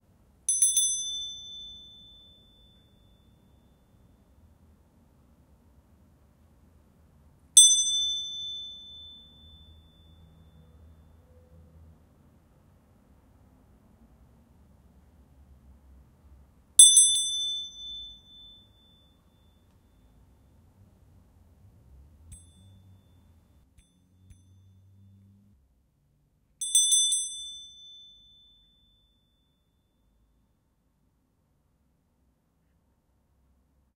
A beats of small bronze bell. The bell painted with oil paint.
See also in the package
Recorded: 03-02-2013.
Recorder: Tascam DR-40
bell, bonze-bell, clang, ding, metallic, ring, ringing, small-bell, ting